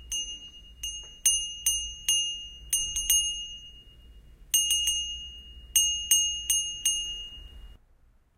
sound of a little bell made of brass. Soundman OKM into Sony MD